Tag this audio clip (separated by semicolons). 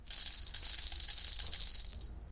bell; ring; ringing